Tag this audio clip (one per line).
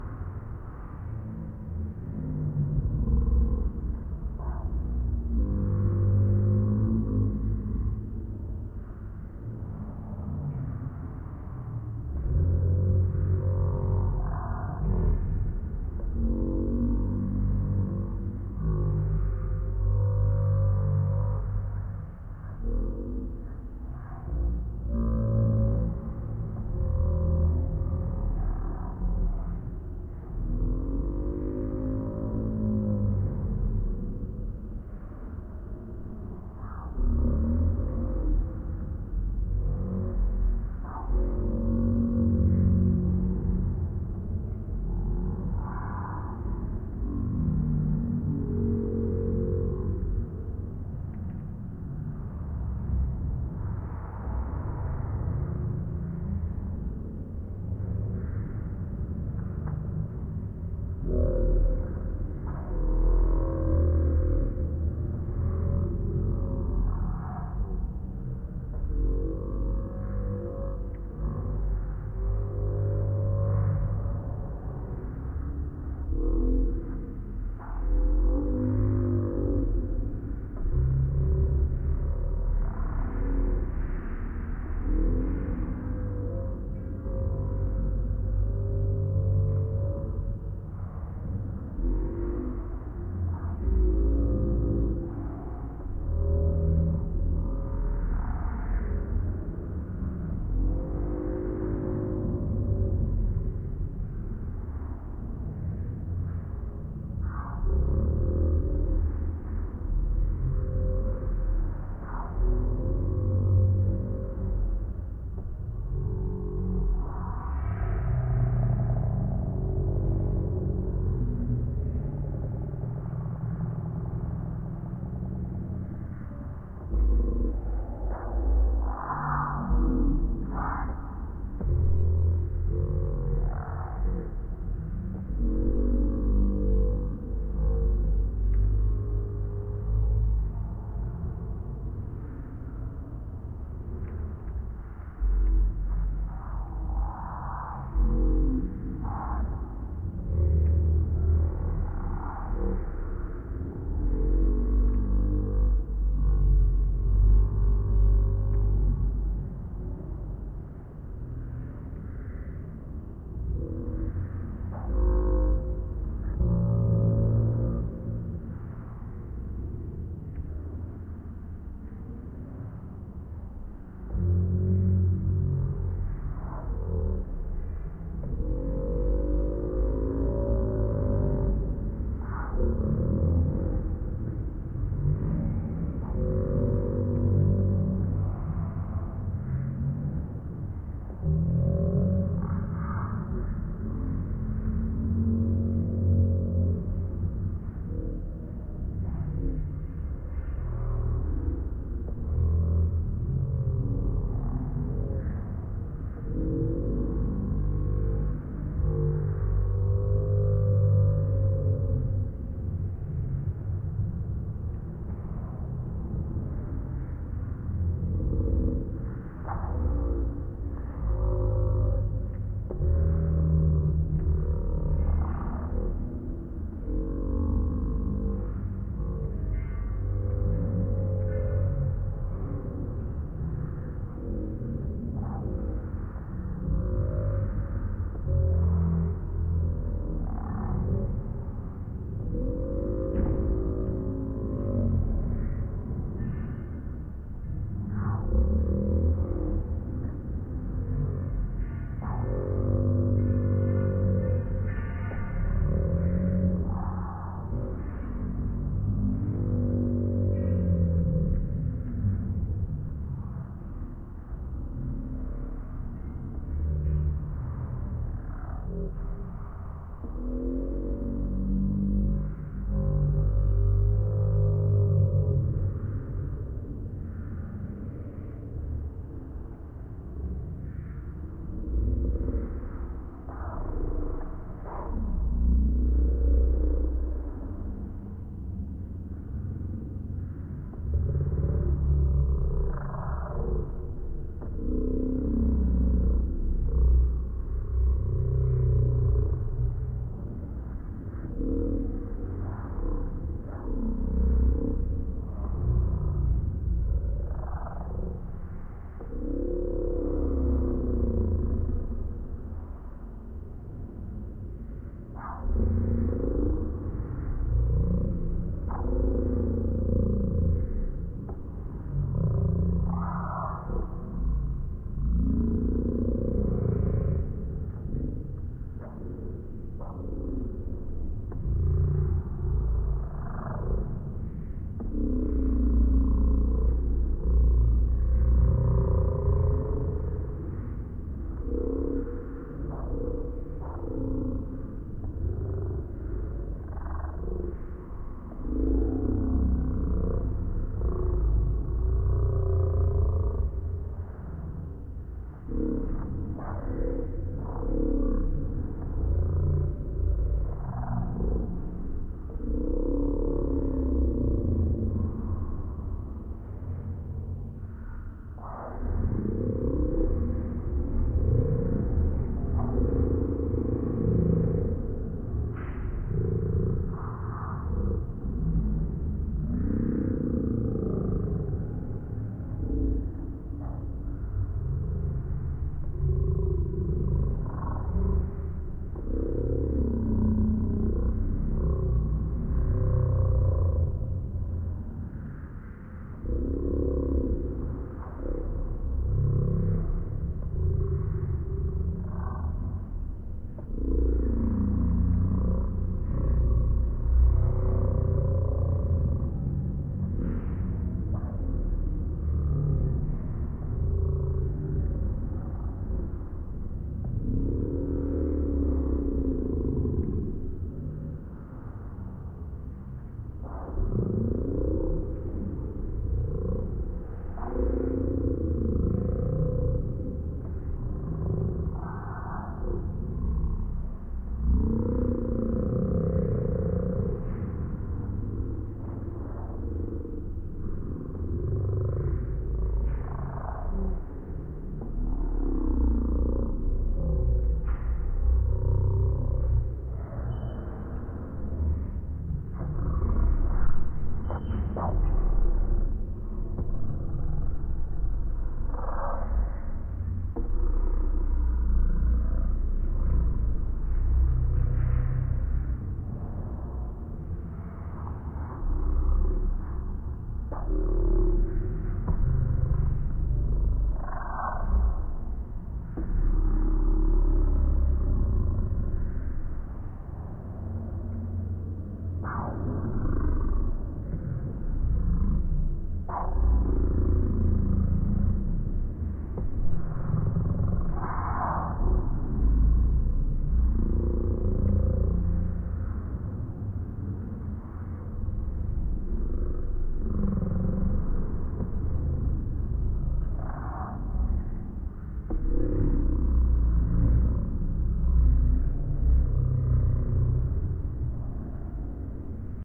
beast
drama
growl
terrifying
phantom
creature
creepy
haunted
creaking
monster
scary
spooky
suspense
terror
ghost
bogey
ambience
horror